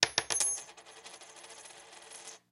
Silver Quarter 4
Dropping a silver quarter on a desk.